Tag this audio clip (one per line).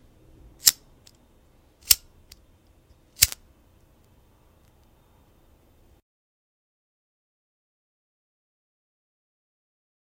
inside
lighter
match